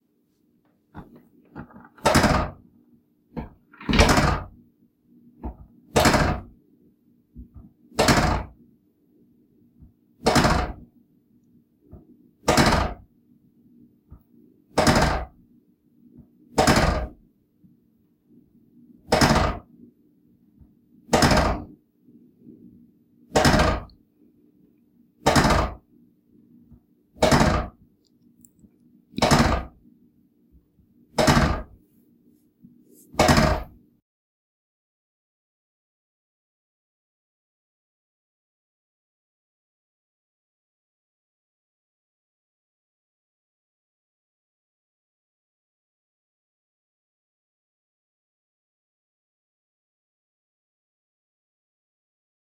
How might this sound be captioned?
Office chair lever on adjustable chair
Chair, Lever, Office